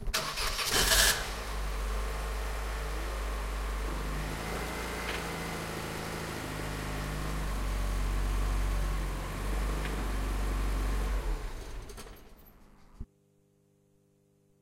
motor car
The sound of a engine starting.
campus-upf, car, engine, UPF-CS13